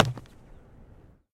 soccer stomp 02
stomping on a ball with your foot
stomp, ball, football, soccer